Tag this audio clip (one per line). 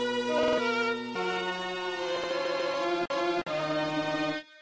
classic
slice